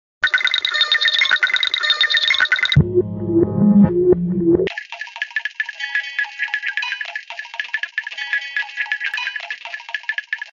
beat with kaoos